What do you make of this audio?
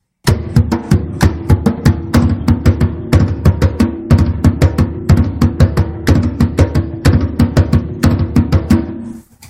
Barril prensado
En algunos pueblos venezolanos en vez de usar la tambora, utilizan este tambor, el cual tiene forma de barril. El cuero es fijado con prensas de metal. De uso en las parrandas venezolanas.Este sonido lo grabamos como parte de una entrevista que le realizamos a Rafael Rondón, director del grupo "El Valle". Se realizó una grabación simple con un celular Sony y luego se editó con Audacity, se ecualizó y normalizó.
"In some Venezuelan towns, instead of using the tambora, they use this tambor, which is shaped like a barrel. The leather is fixed with metal presses. For use in Venezuelan parrandas. We recorded this sound as part of an interview we conducted with Rafael Rondón, director of the group "El Valle".